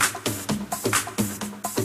bongo loop with hats 130bpm
130 BPM bongo loop with high-hats
drums, bongo